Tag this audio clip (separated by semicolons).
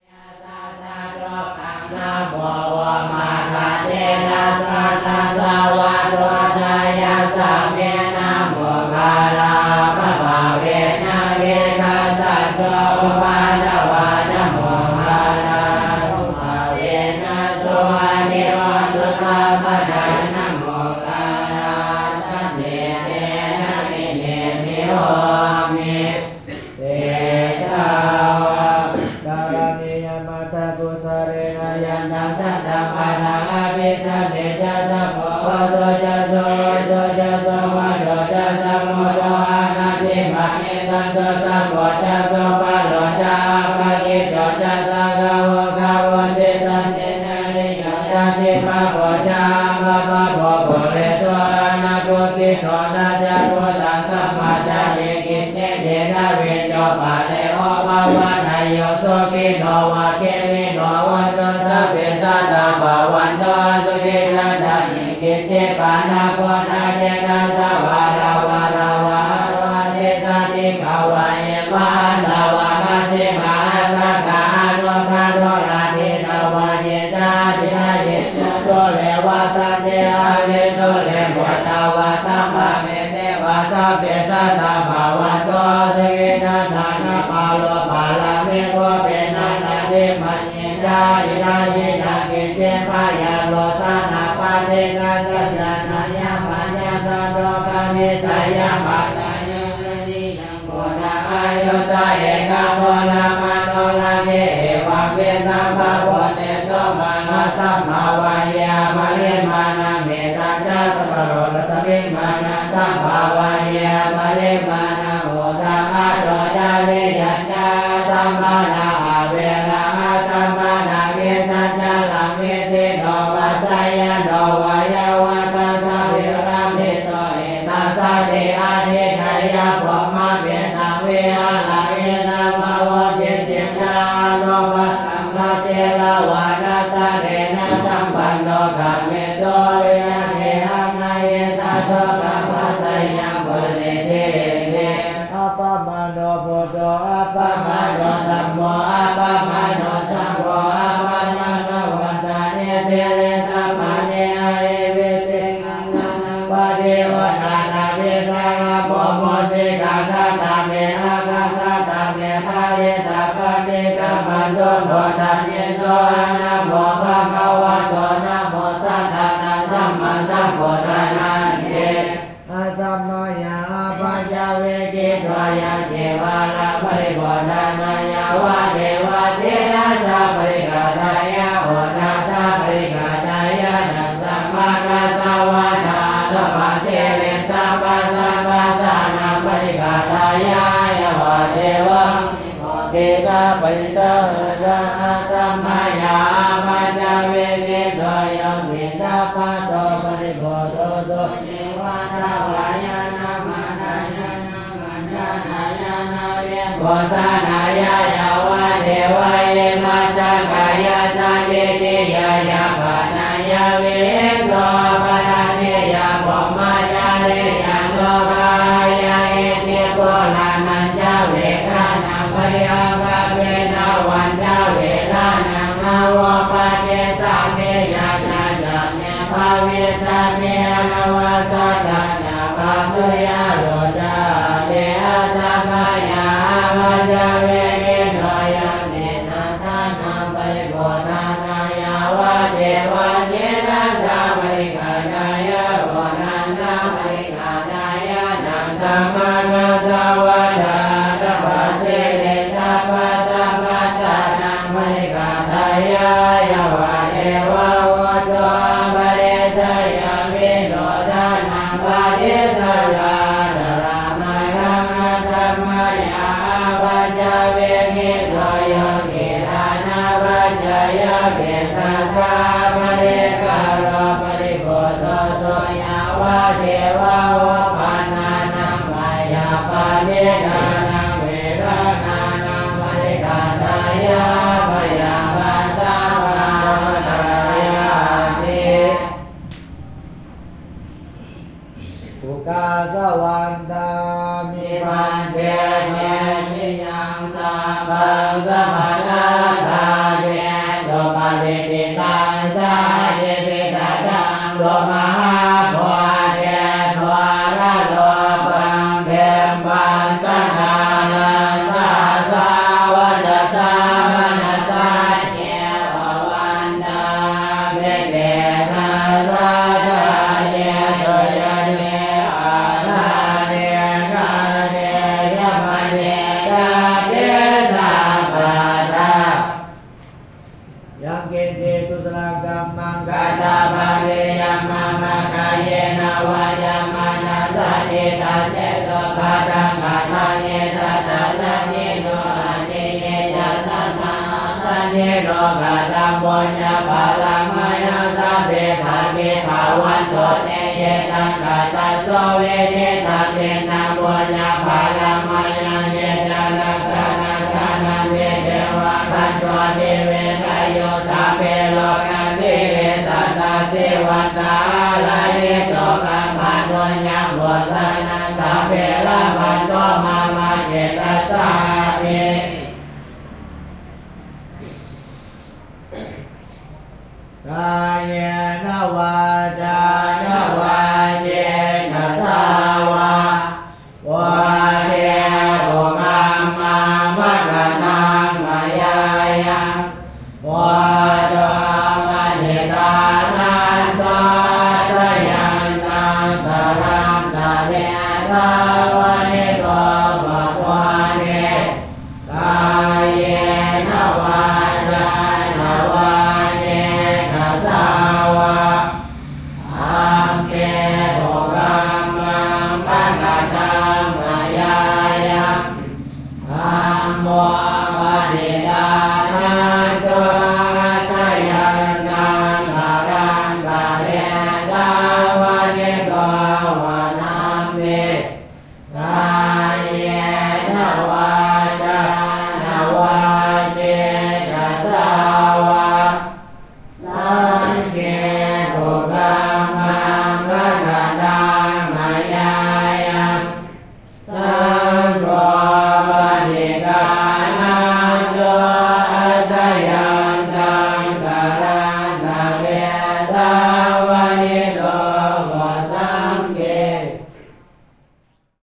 Mekong; Buddha; holy; field-recording; Monk; river; prayer; scripture; meditation; sensaikharam; Buddhism; Asia; chant; UNESCO; religion; Wat; temple; Laos; Sene; Luang; monastery; Lao; Luang-Prabang; Theravada; Prabang; Monks; pray; recite